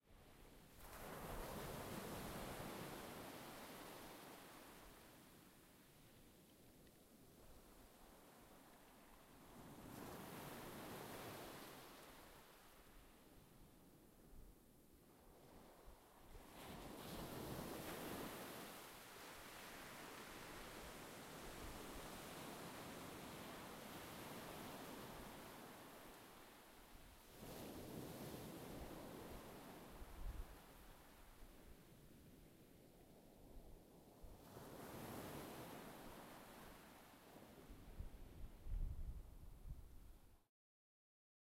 Beach Tide
This sound is of the tide at the beach.